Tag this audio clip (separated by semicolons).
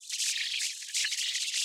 sounddesign; digital; future; electronic; electric; effect; strange; glitch; freaky; noise; weird; soundeffect